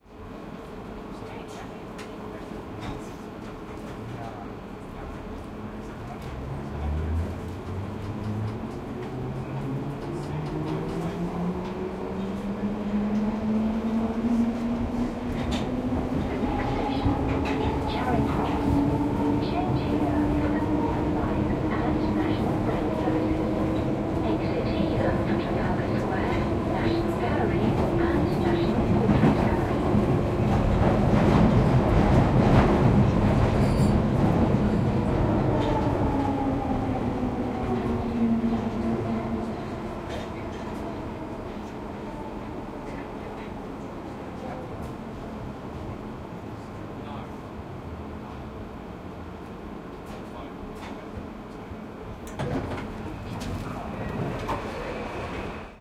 Bakerloo Line Train - On Train
On the Bakerloo line from Piccadilly Circus to Charing Cross.
A credit for using this sound would be appreciated but you don't have to.
If you'd like to support me please click below.
Buy Me A Coffee
Station, Trains, Transport, Underground, Travel, Commute, Ambience, Bakerloo, Locomotive, Tube, Doors, Metro, Charing-Cross, Announcement, Subway, Train, London, Platform, Public, Piccadilly-Circus